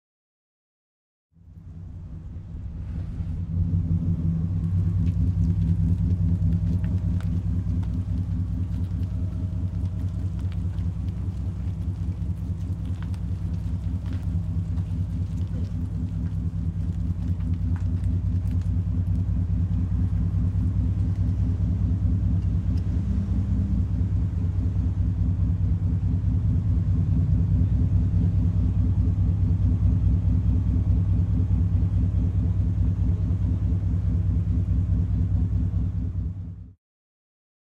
1950 Ford Mercury interior ignition and slow cruise
Recorded on Zoom H4N with Rode NTG-3.
The sound a vintage 1950 Ford Mercury car with v8 engine cruising slowly recorded from outside.
drive-by, vintage, drive, ford, car, 50s, auto, 1950, mercury, rev, v8, automobile, cruise, vehicle, hotrod, engine, start